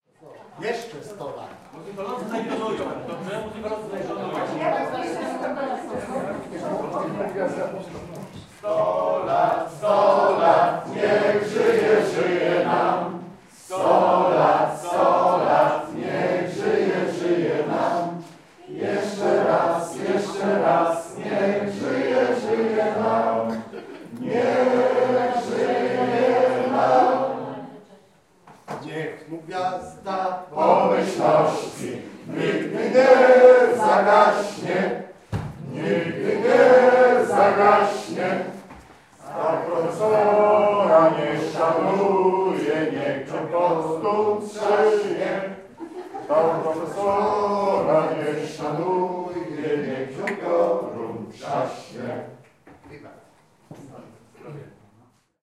22.03.2016: around 1.00 p.m. at the Oskar Kolberg Institute in Poznań (Poland). The jubelee of 90 birthday of professor Bogusław Linette (musicologist and ethnographer). Paricipants of the event are singing two traditional Polish songs - "Sto lat" and "Niech mu gwiazdka pomyślności". "Sto lat" (One Hundred Years) is a traditional Polish song that is sung to express good wishes, good health and long life to a person. It is also a common way of wishing someone a happy birthday in Polish. The song's author and exact origin are unattributed. The song is sung both at informal gatherings (such as birthdays or name days) or at formal events, such as weddings. Frequently, the song "Niech im gwiazdka pomyślności" will be sung afterwards, excluding kids' parties, as the song makes reference to alcohol consumption. The song serves the equivalent function of "Happy Birthday To You" or "For He's a Jolly Good Fellow".
lYRICS:
Sto lat, sto lat,
Niech żyje, żyje nam.
Sto lat, sto lat,